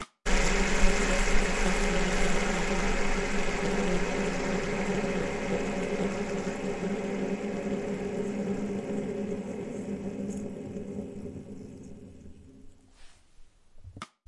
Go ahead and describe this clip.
Rotating fidget spinner on wooden table v2